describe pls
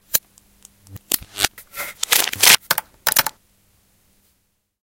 soundscape HD Laura
She is sure she used Andreas sound from Barcelona.